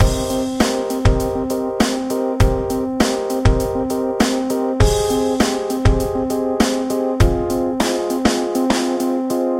Loop CoolDude 03
A music loop to be used in storydriven and reflective games with puzzle and philosophical elements.
videogame, music, videogames, indiedev, loop, Puzzle, indiegamedev, video-game, games, gaming, Thoughtful, music-loop, game, gamedeveloping, Philosophical, gamedev, sfx